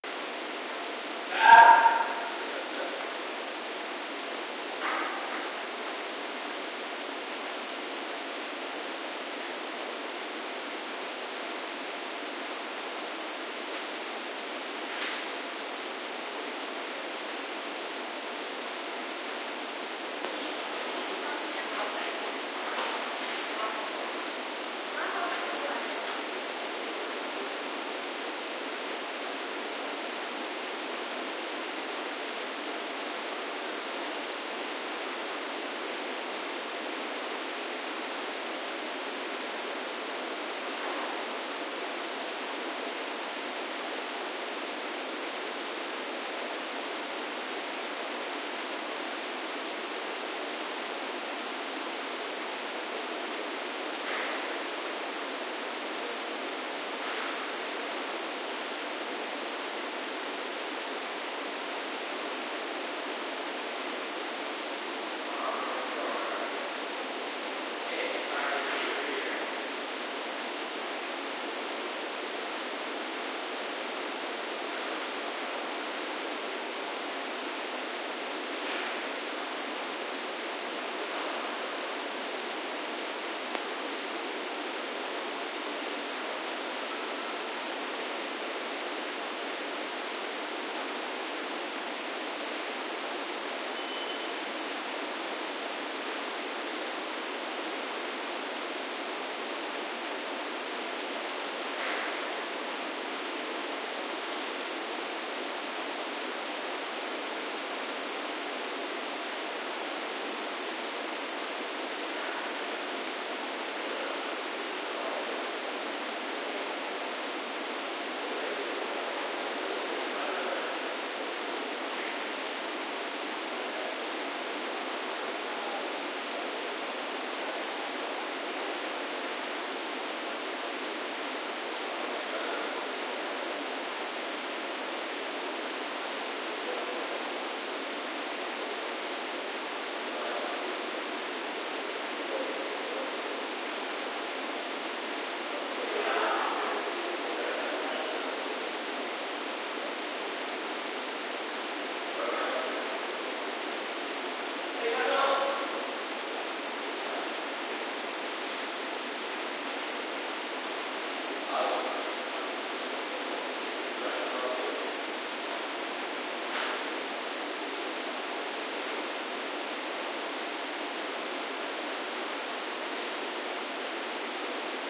ns MMstairwell
A binaural recording using home made microphones in Carnegie Mellon's Margaret Morrison Hall
pittsburgh, stairwell